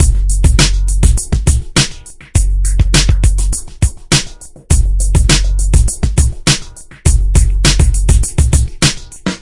A boomy break I made from a variety of free hits using Jeskola Buzz sequencer, with an Ohm Boys LFO effect applied.